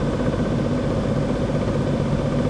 Household AC On Run Loop 02
Same description as the first one, but this for a little variation in-game.
[My apartment is pretty old, still using those large window/through the wall AC's so here's a loop that could be used for a game or something.]